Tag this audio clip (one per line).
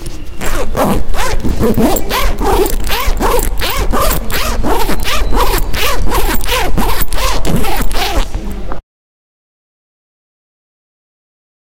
Sound
Window
Open
Library